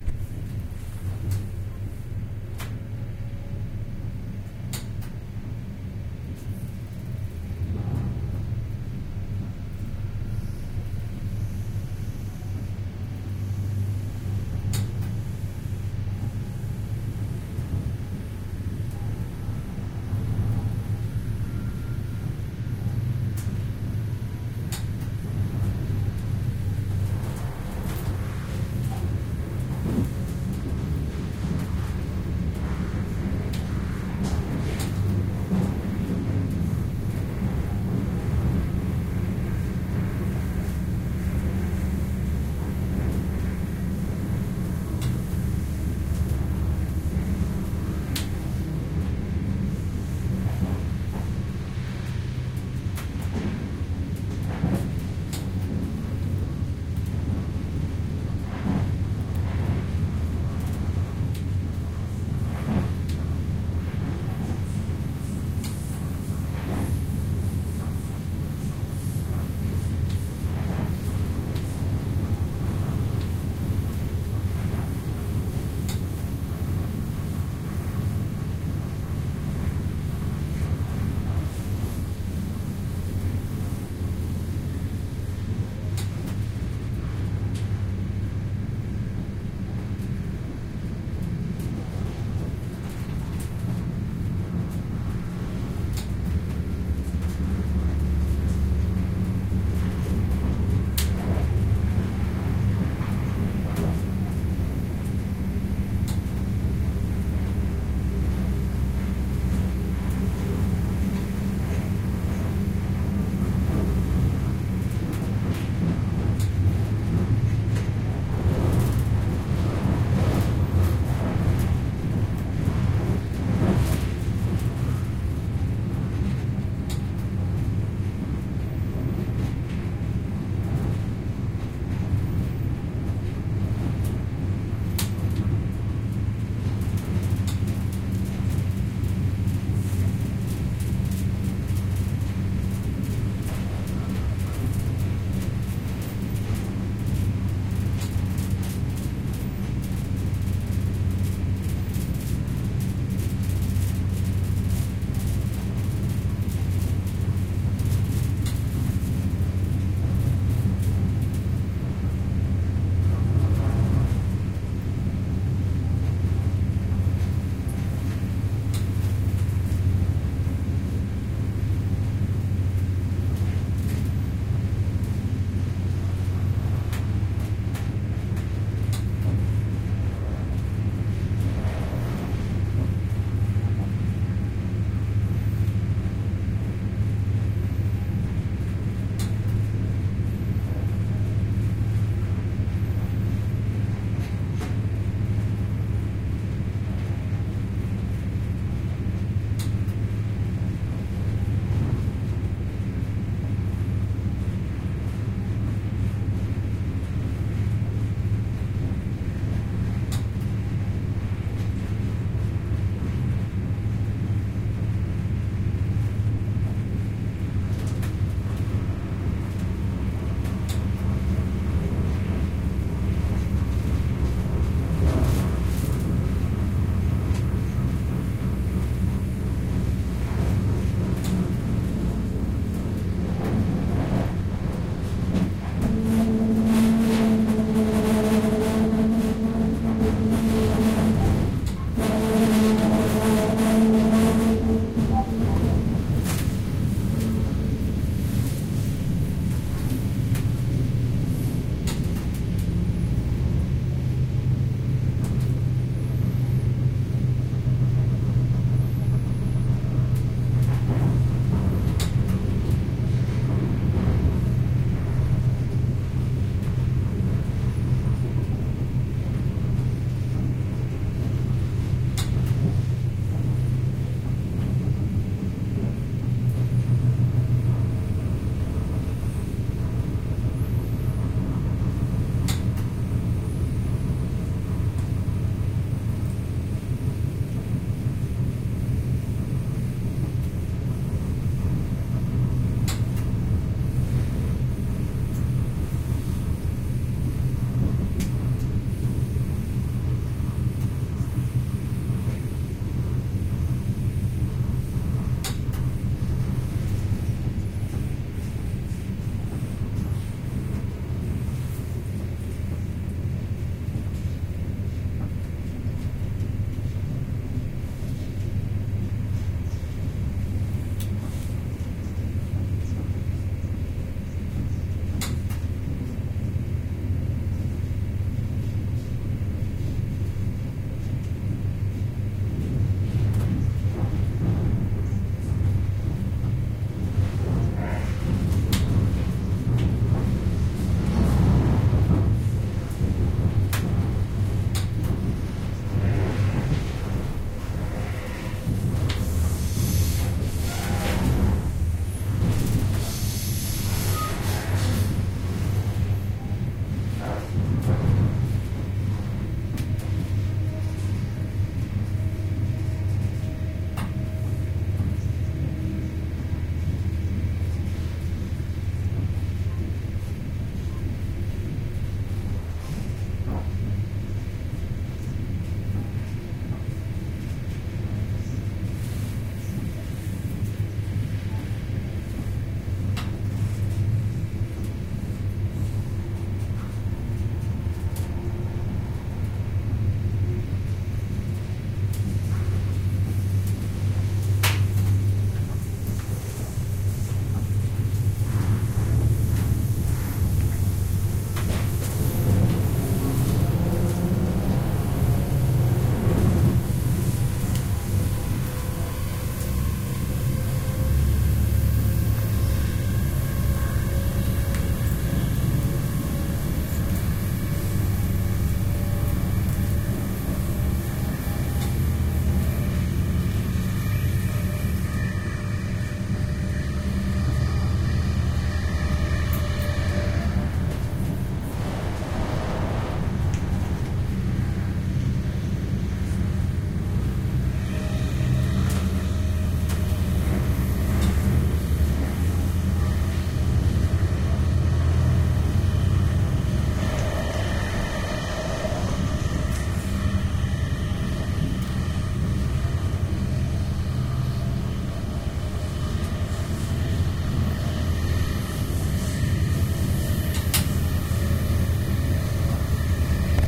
Atmo Intercity
..inside an intercity (IC) near Stuttgart. Recorded in an almost empty train on a fairly even route section at a quite constant velocity, sitting in a cabin for six passengers with five empty seats, compartment door closed at around 8 pm.
railway, intercity, wagon, railroad, engine, trains, Zug, interiour